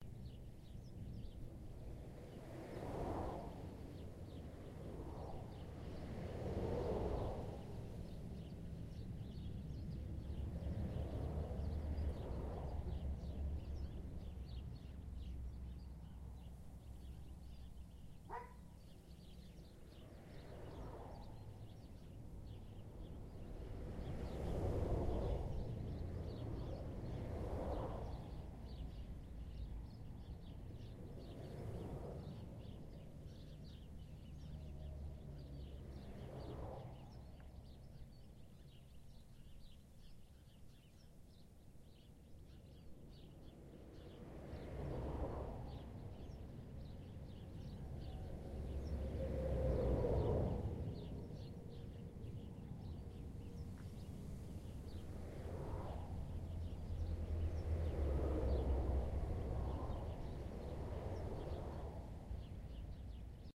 Traffic Highway (Listened from Under)
Traffic of highway listened from under.